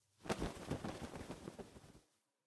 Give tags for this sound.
bird flapping wings

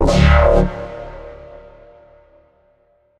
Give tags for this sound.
synthetic Sound

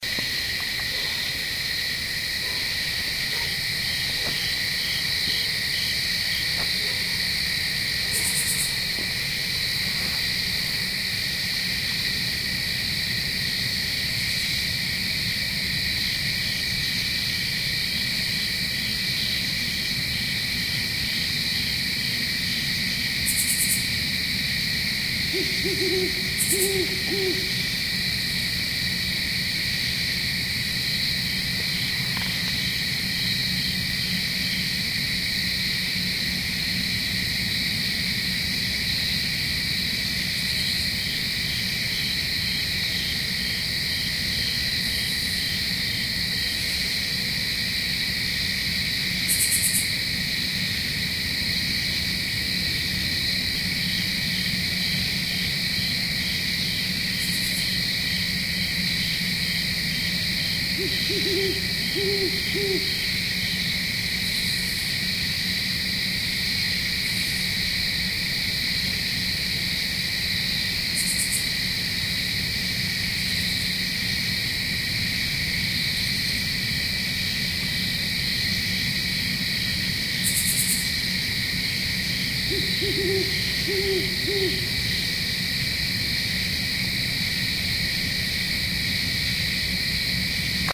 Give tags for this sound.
woods crickets

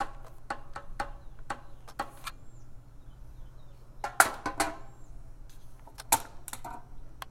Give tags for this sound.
ambience city night

